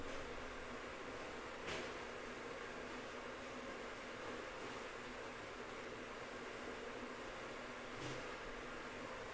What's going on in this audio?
Coffee Grinding/Kitchen Ambience
mug, grinding, bathroom, epic, tap, bath, ambient, filling, pour, sink, room, other, ambience, water, pouring, grind, beans, running, tub, high-quality, kitchen, fill, faucet